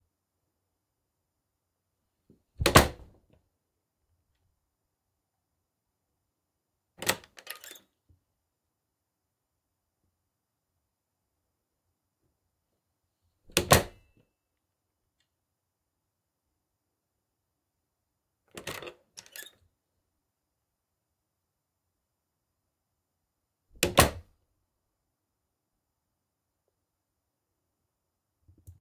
opening and closing door